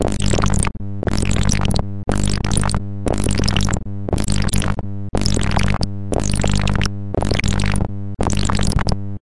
A buzzy bass loop made from my first Reaktor ensemble.
bass, buzz, loop, noise, sound-design